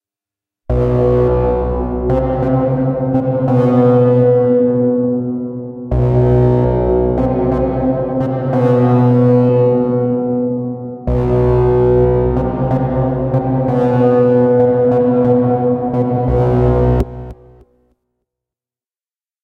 RH THERELICSynthBass
Ominous gritty synth bass loop
bass, electronic, gritty, ominous, power, Synth